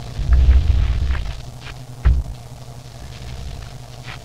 distorted noise
zniekształcony szum